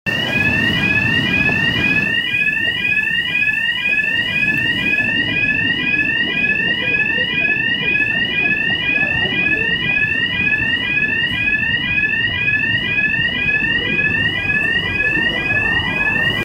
High pitched burglar alarm going off.